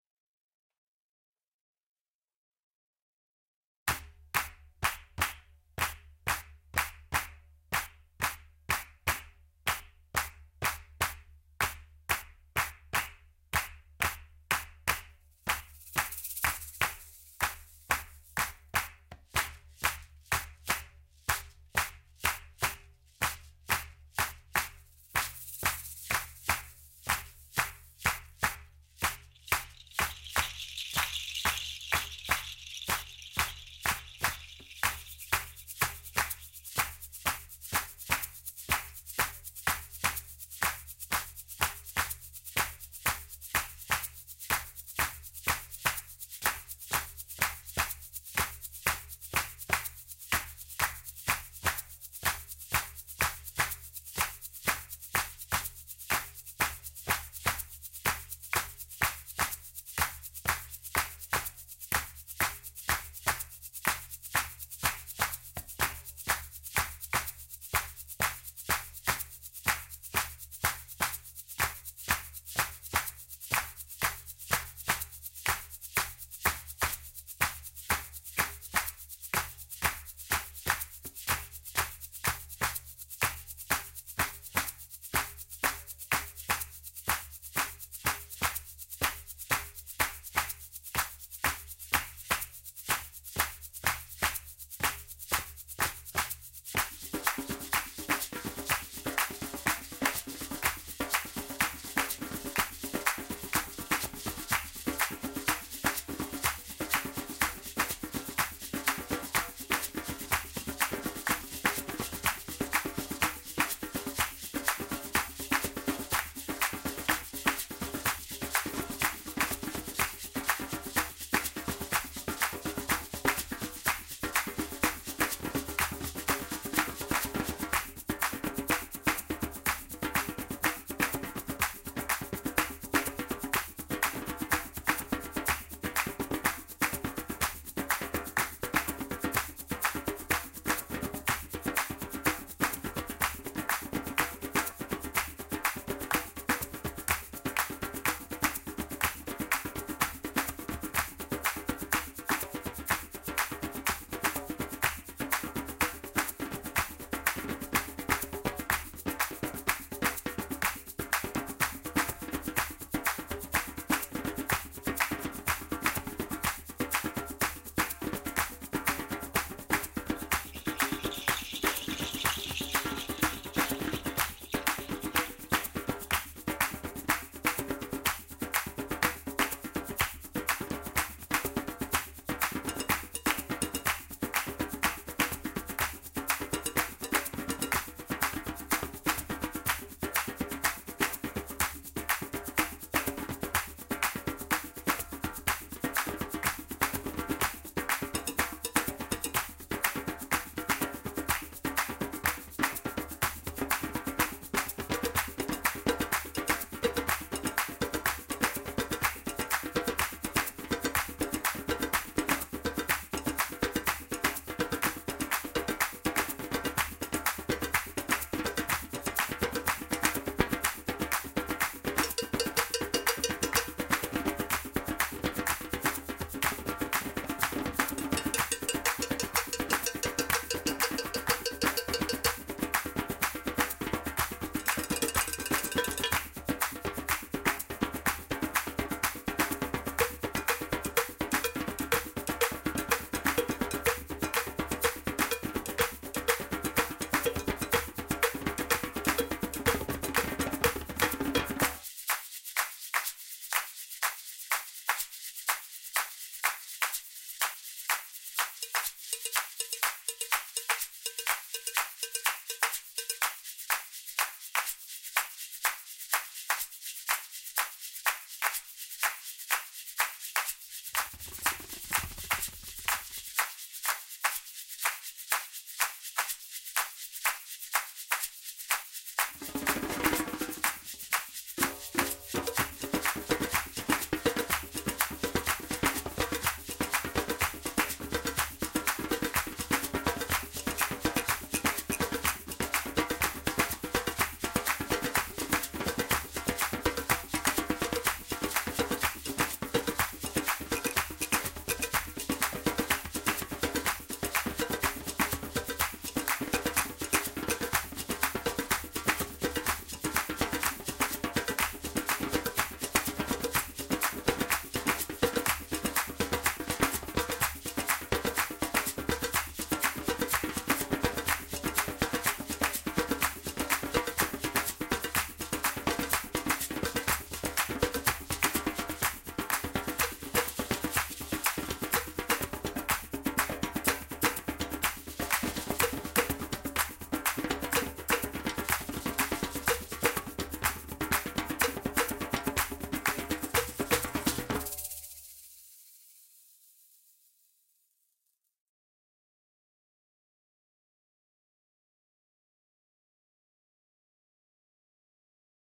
Jerusalema 124 bpm - Percussion
This is a mix of my percussion recordings to the song Jerusalema by Master KG.
Contains: djembe x 4 (2x doubled), shaker, shekere, TheNight, cowbell, Birds.